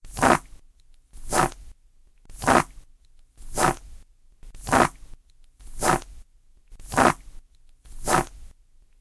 walking on snow
footstep, snow, walk, walking, steps, footsteps